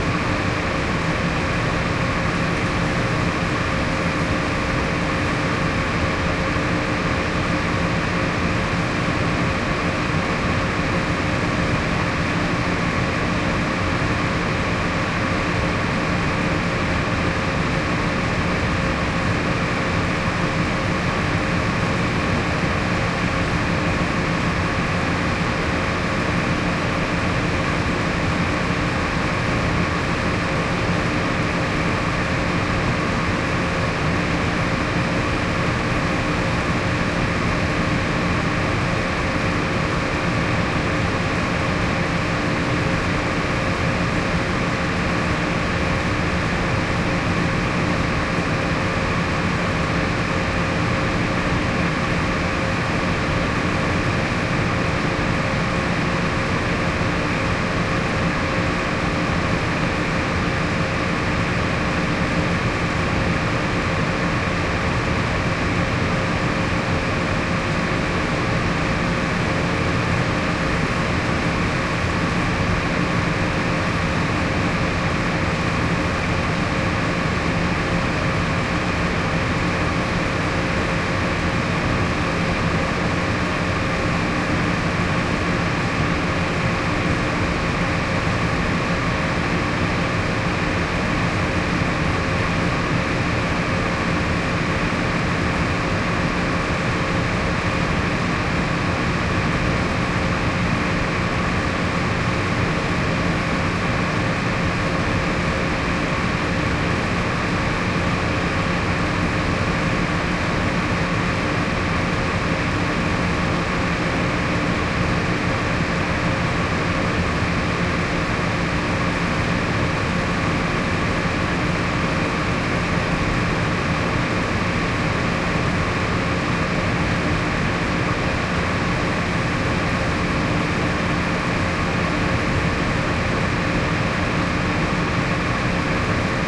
metro subway hallway corner noise heavy ventilation rumble
corner, metro, rumble